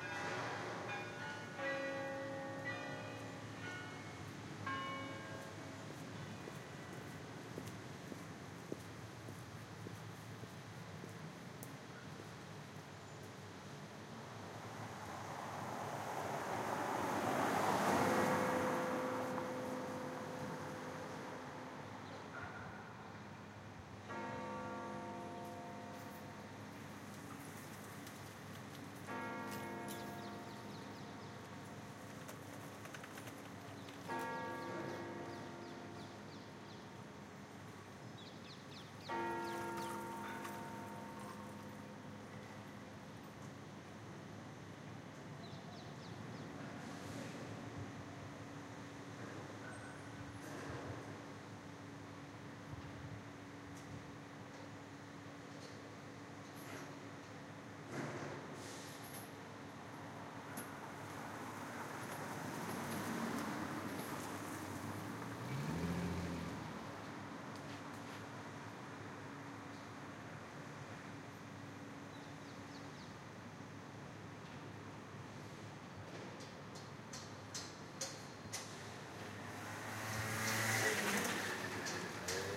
Amsterdam Morning Ambience
Recording of Amsterdam in the morning [Tascam IM2]
Ambience, Amsterdam, Atmosphere, Bell, City, Field-Recording, Light-Traffic, Morning, Quiet, Street, Tower